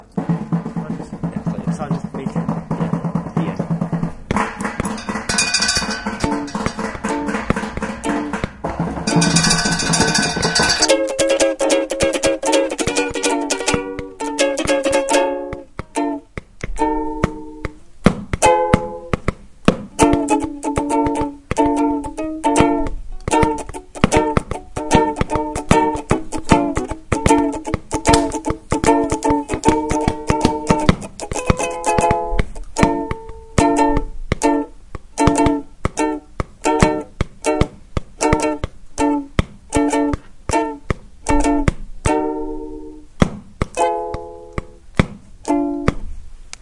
Soundscape made by pupils at the Ramon Berenguer school, Santa Coloma, Catalunya, Spain; with sounds recorded by pupils at Humpry David, UK; Mobi and Wispelberg, Belgium.